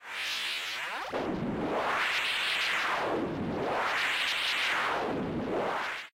Retro Futuristic Alien Sound 01
sound, effect, futuristic, game, old, sample, computer, tune, scifi, school, retro, original, sci-fi, fiction, cool